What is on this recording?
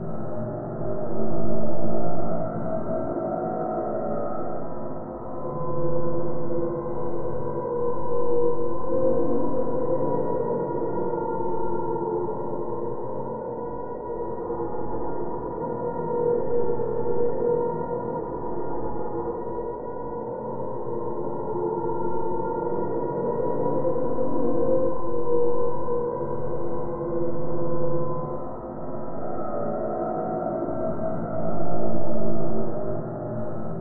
snare ambient sound effect
effect, ambient, snare, sound